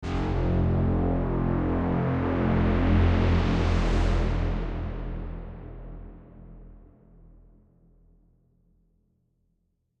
Synth Scary Note Pitch Slides Down Reverb